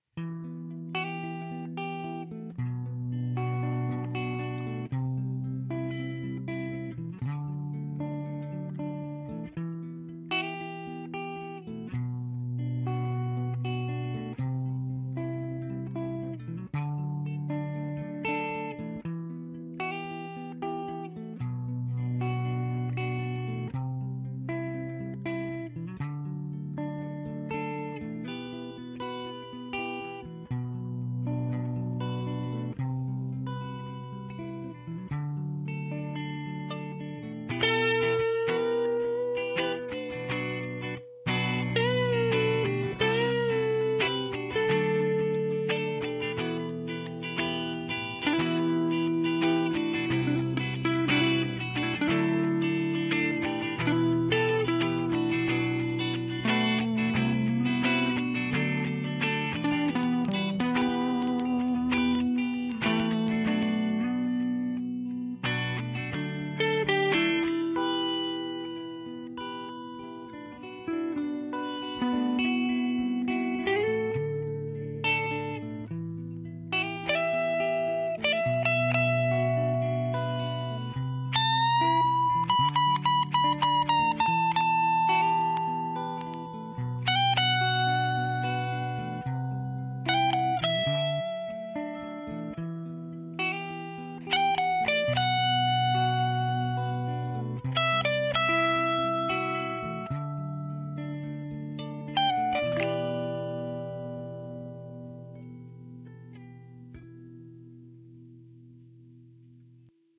Guitar song
guitar, improvisation, matheus